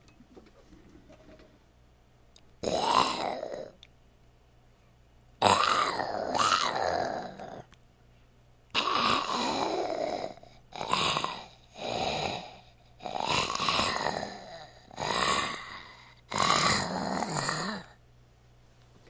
Recordings of me making some zombie sounds.
undead, creepy, zombie, sounds, sinister, horror, freaky, eerie, suspense, monster, scary, terror, zombie-sounds, spooky